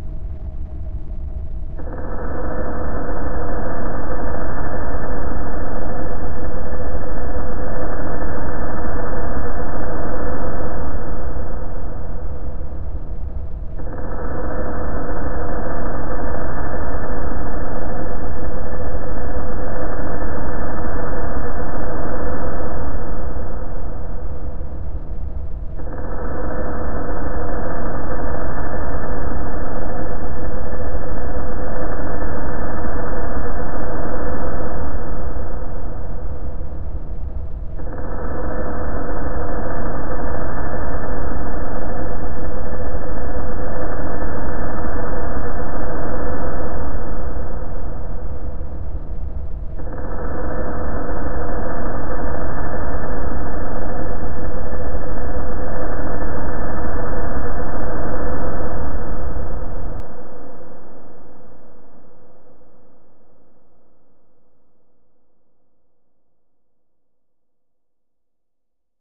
An attempt to produce a sound that could be tectonic plates shifting. Fragments of field recordings heavily processed. Original sounds are draggin log on wood, mixed with fingernails on a black board.
landmass, tectonic, earth, grinding